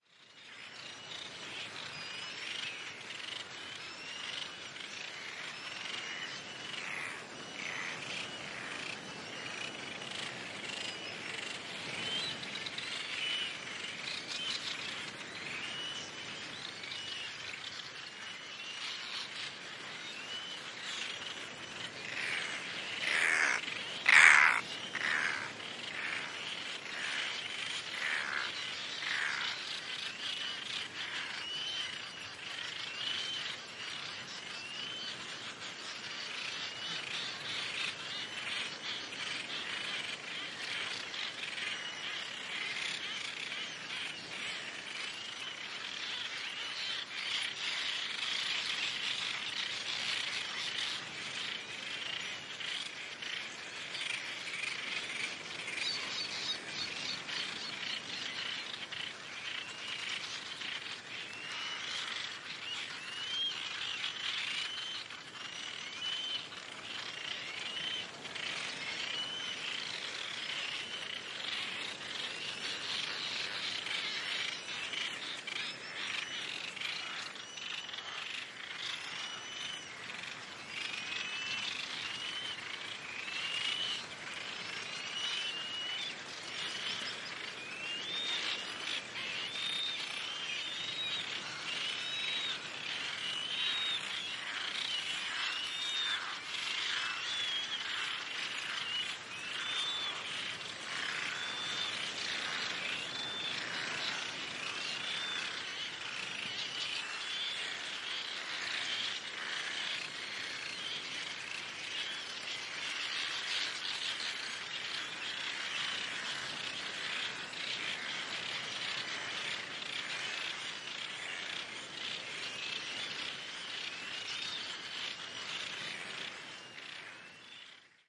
Birds Ambience Loud
Seabirds returning to roost at night, Bird Island, Seychelles